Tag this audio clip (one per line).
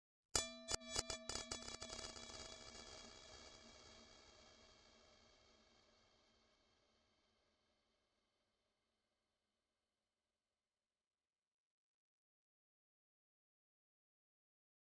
field
recording
bing
cartoon